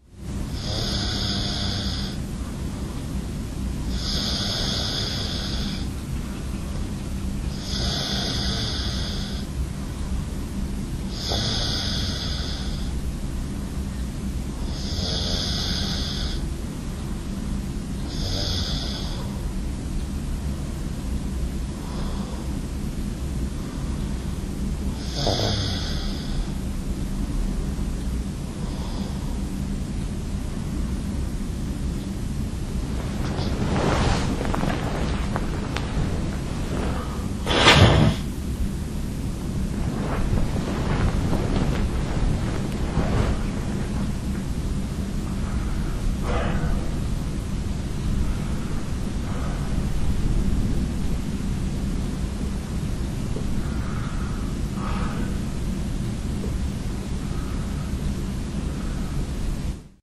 Moving while I sleep. I didn't switch off my Olympus WS-100 so it was recorded.
bed, body, breath, field-recording, household, human, lofi, nature, noise